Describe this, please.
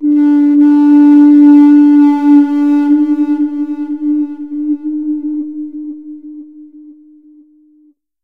Freed-back - 11
One long note. Part of a pack of collected flute-like sounds made from speaker-mic feedback. Specifically, putting a mic into a tincan, and moving the speakers around it. Good for meditation.